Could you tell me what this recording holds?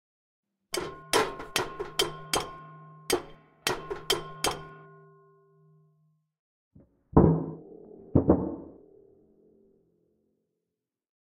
blast door knocking and banging
sounds recorded on zoom h2n and edited in audacity.
twang, friction, clunk, hit, reverberation, impact, metallic, metal